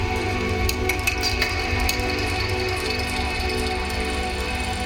Space Sound Rythm Voice Creatures Synth Soundscape 20201014
Space Sound Rythm Voice Creatures Synth Soundscape
SFX conversion Edited: Adobe + FXs + Mastered